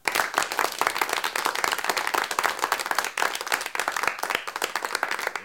Small audience clapping